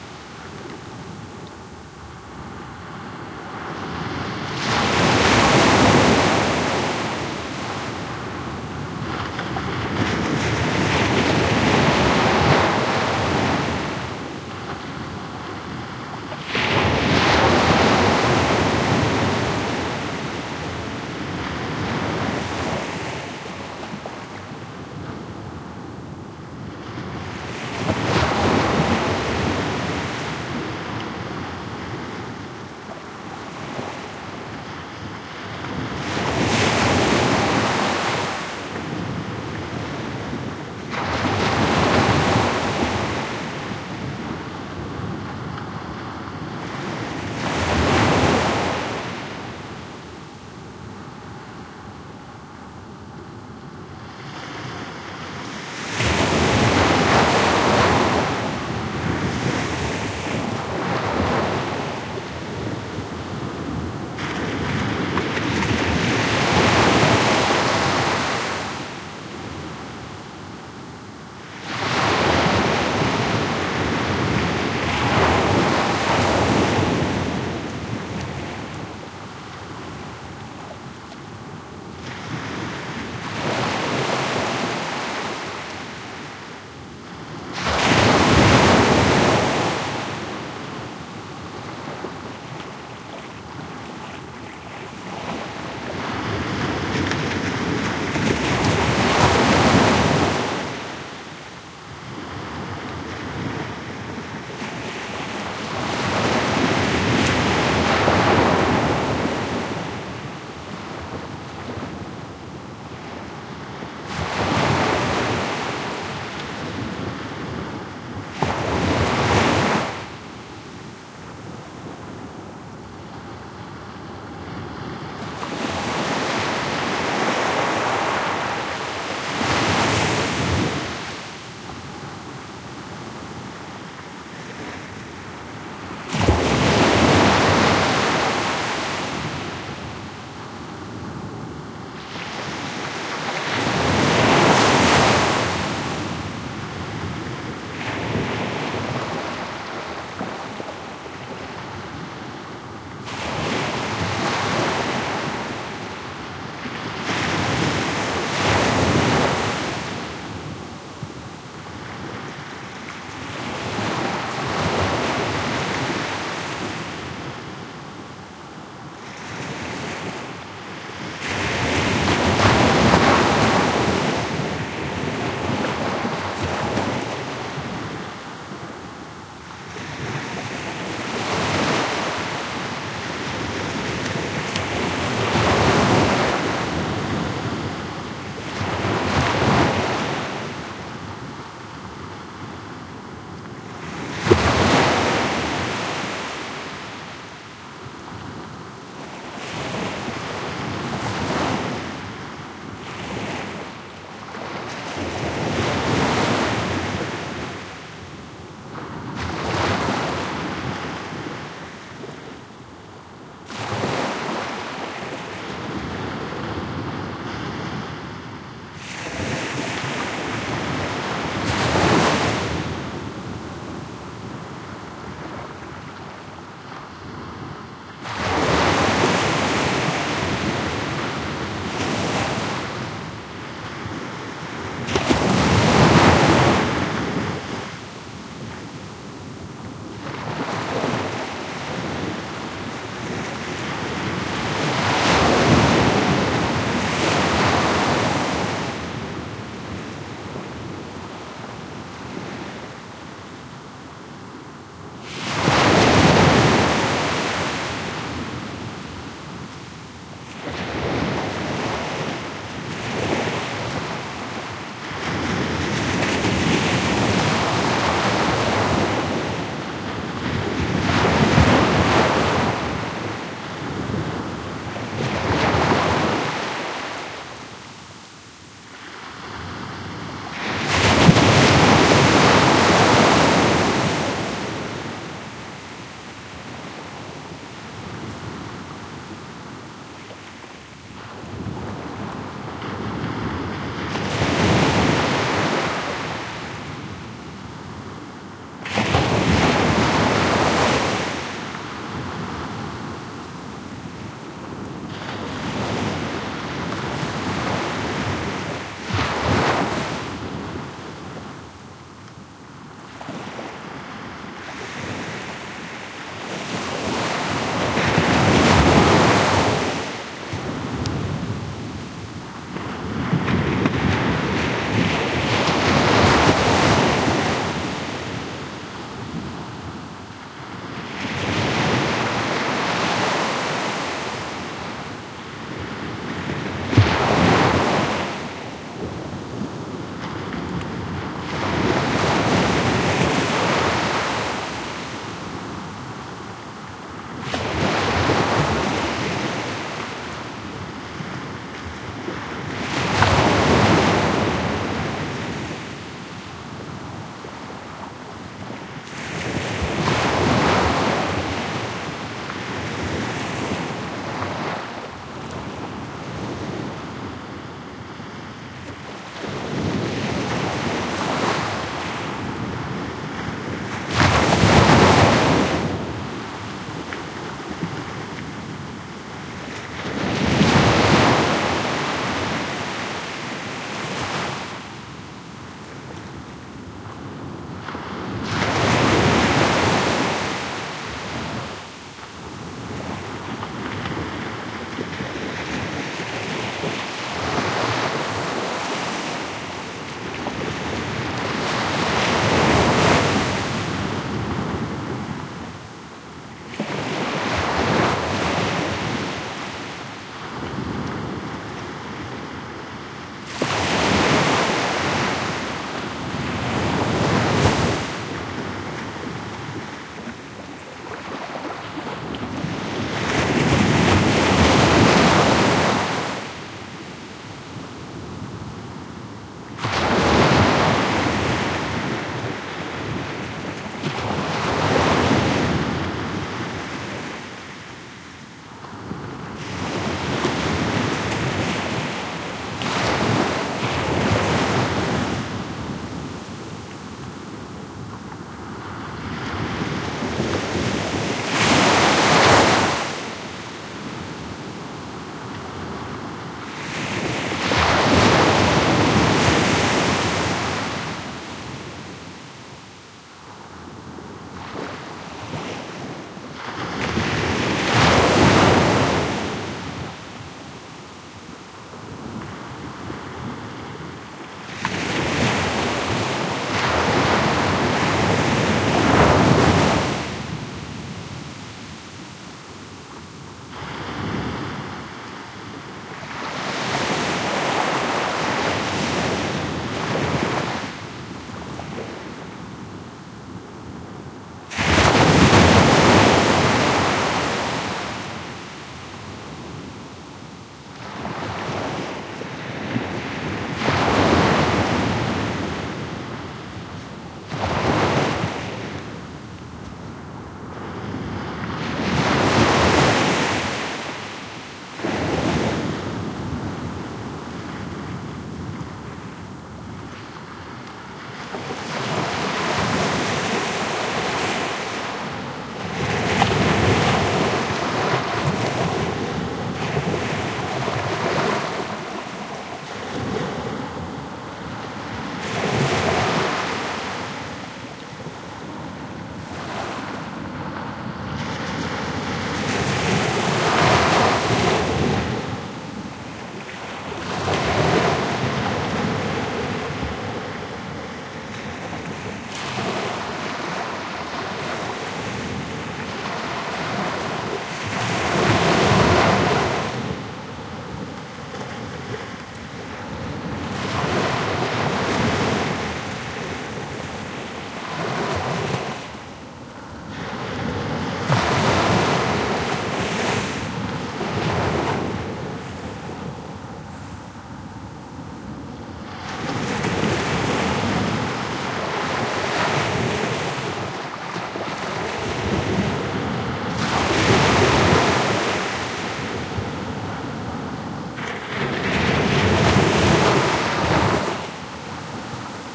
20121014 surf closeup 06
Waves breaking on a sandy shore, recorded right over the source. Good stereo image better appreciated in headphones. Recorded at Barra del Rompido Beach (Huelva province, S Spain) using Primo EM172 capsules inside widscreens, FEL Microphone Amplifier BMA2, PCM-M10 recorder.
Spain, surf, field-recording, waves, rumble, beach, splashing, water